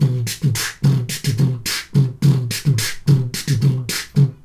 I recorded myself beatboxing with my Zoom H1 in my bathroom (for extra bass)
This is a beat at 105bpm.